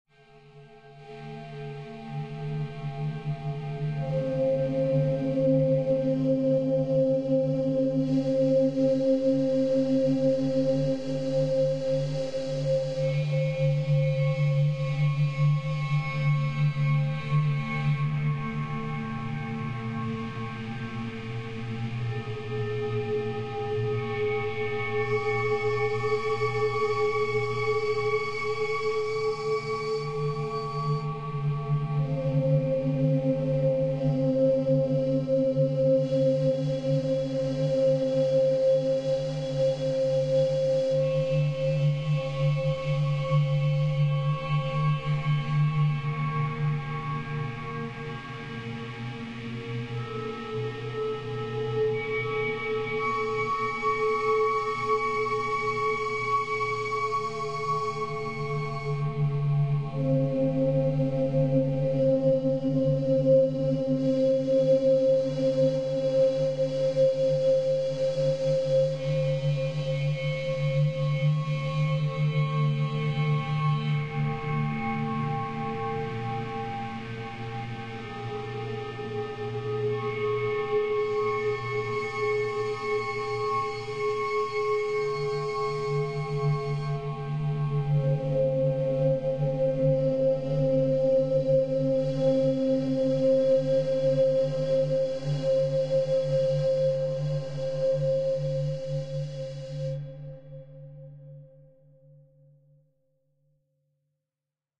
dark organic drone
metal tank, rubbing it with a metal stick.lot of pitch shift,time stretch and modulation.
sound-design, lo-fi, sound-effects, organic, concrete, field-recording, drone, experimental, atmosphere